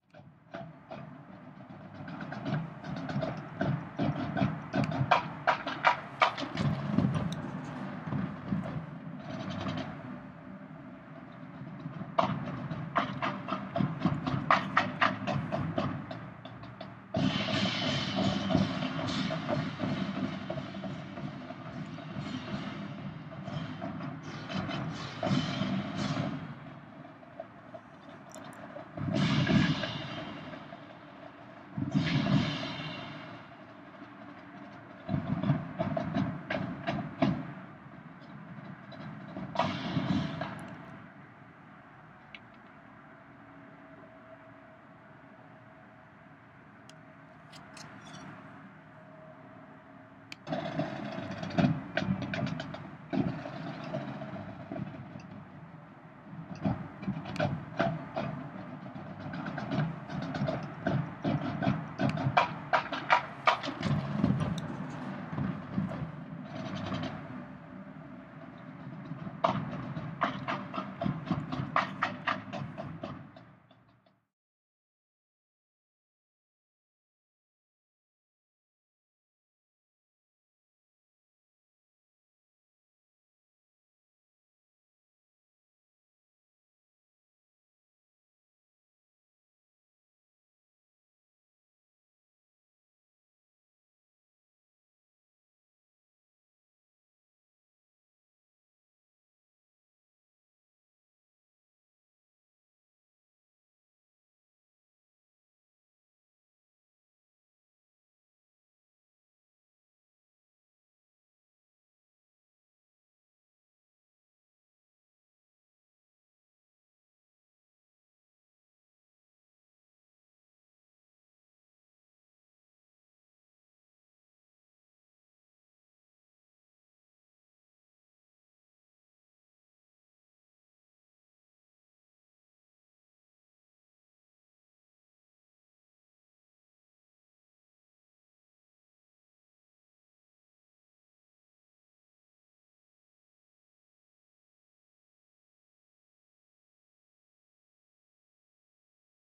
A marching band playing recorded about a block away. Recorded on a MacBook.
Band, MacBook, Marching